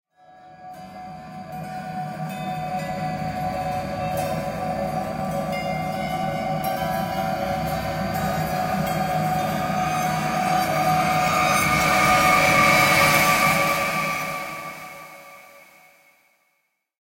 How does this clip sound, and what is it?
Scary Hits & Risers 004
scary, freaky, sounddesign, movie, riser, sound, fx, hit, hollywood, effect, cluster, soundeffect, horror